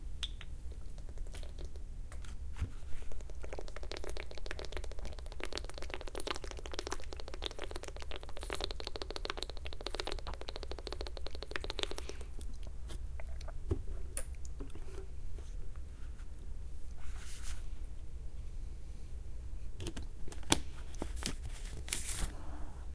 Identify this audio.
A recording of taking a rather large drink from a standard sized water bottle.
drink, close, field-recording, replace, drip, remove, bottle